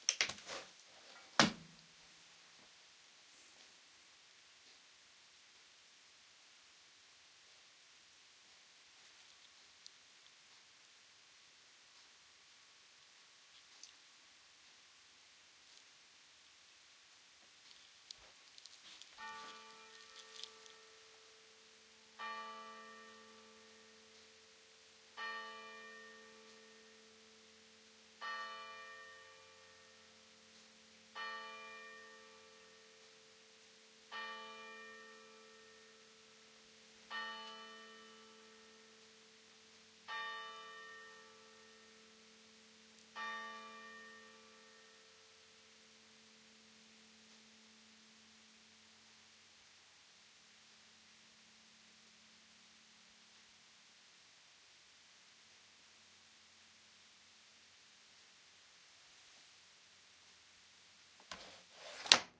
Begin avondklok210123 21h00
The beginning of the Corona curfew at 21h00, as recorded in the city of Groningen (Tuinbouwdwarsstraat/Klein Vaticaan) at the 21st of January 2021.
Het begin van de Corona-avondklok om 21:00, opgenomen in de stad Groningen (Tuinbouwdwarsstraat/Klein Vaticaan) op 21 januari 2021.
openen, veldopname, door, clock, bell, sluiten, curfew, deur, kerkklok, klein-vaticaan, corona-time, close, klok, time, avond, avondklok, coronatijd, groningen, field-recording, evening, open, church-bell, corona, tijd